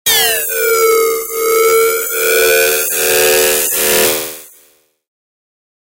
Harsh FM World 3
ΑΤΤΕΝΤΙΟΝ: really harsh noises! Lower your volume!
Harsh, metallic, industrial sample, 2 bars long at 120 bpm with a little release, dry. Created with a Yamaha DX-100